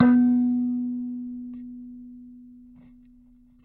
Tones from a small electric kalimba (thumb-piano) played with healthy distortion through a miniature amplifier.
bloop, tone, mbira, electric, thumb-piano, amp, kalimba, piezo, contact-mic, tines, blip, bleep